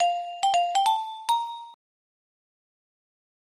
3 - Unlock achievement for games
Simple sound made with LMMS. It might be used for an achievement in a game.